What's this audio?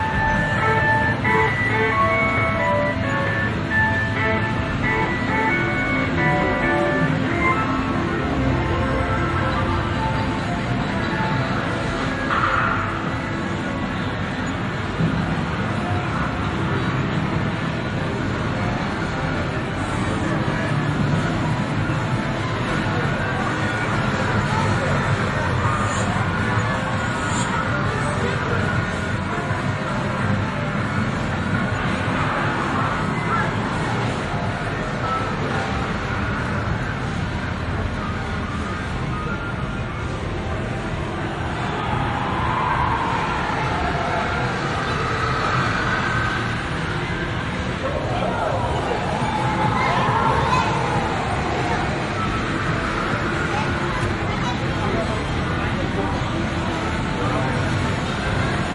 bangalore arcade
Taking a short Strawl through a Gamearcde in the Center of Bangalore, India
arcade, binaural-recording, field-recording, game, video